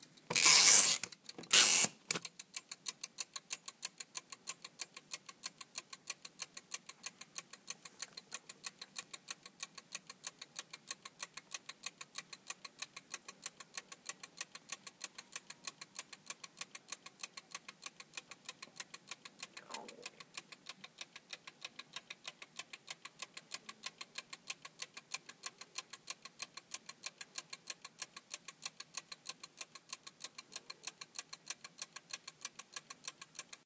the timer for my gas fireplace being wound up and then ticking away for about 30 seconds.
bomb, clock, ticking, tic-toc, time-bomb, timer